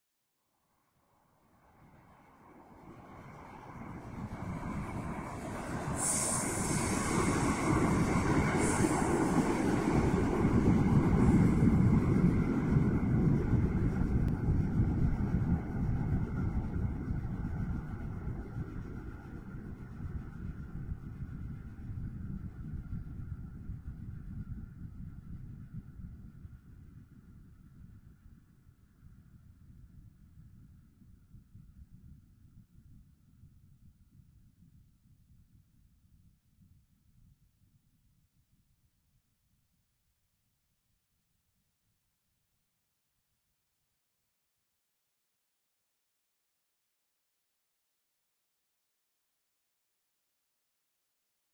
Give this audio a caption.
Fantasy Train Passage
A train from other lands passes by and fades into a dark far machinery rumble.
sci, science-fiction, futuristic, machinery, passing, engine, sci-fi, scifi